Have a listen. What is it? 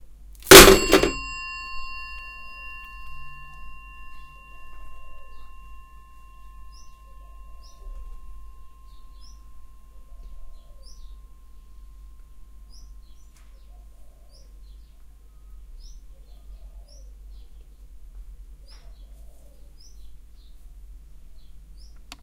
Dropping a phone head onto its body, creating a continuous ringing sound. Recorded with a TASCAM DR-05.